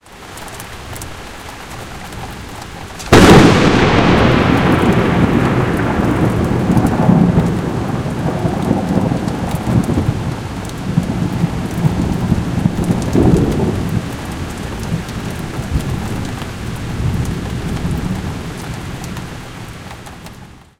Very loud lightning strike / Głośne uderzenie pioruna
Tascam DR100MkIII + Rode NT4
Lightning, Loud, Thunder, Thunderstorm, Weather